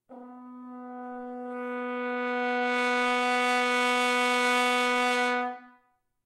horn crescendo tone B3
A sustained B3, with a crescendo from soft to loud on the horn. Recorded with a Zoom h4n placed about a metre behind the bell.
b3, brass, crescendo, french-horn, horn, tone